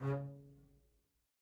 One-shot from Versilian Studios Chamber Orchestra 2: Community Edition sampling project.
Instrument family: Strings
Instrument: Solo Contrabass
Articulation: spiccato
Note: C#3
Midi note: 49
Midi velocity (center): 63
Microphone: 2x Rode NT1-A spaced pair, 1 AKG D112 close
Performer: Brittany Karlson